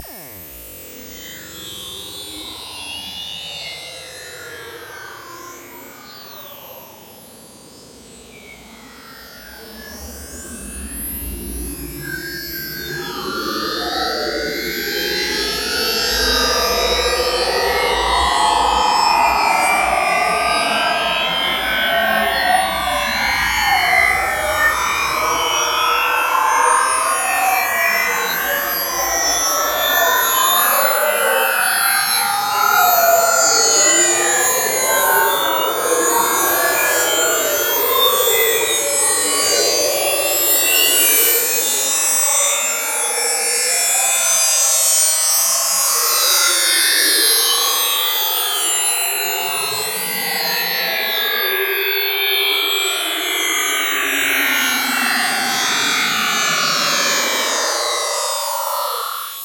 Fun with Bitmaps & Waves! Sweet little program that converts bitmap photos into sound! Added some reverb and stereo affects in Ableton.
bitmaps-and-waves, ambiance, ambient, background, atmosphere, trees, ambience, image-to-sound, soundscape, electronic, sci-fi